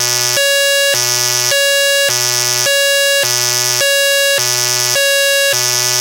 archi scifi alarm targeted 04
Science fiction alarm for being targeted by a weapon. Synthesized with KarmaFX.
alarm, danger, fictional, indication, indicator, science-fiction, scifi, synthesized, synthesizer, tracked, tracking, warning